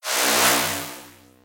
robotic Swish sound
droid, gadget, machine, mechanical, robotic, space, spaceship, swish, swoosh, whoosh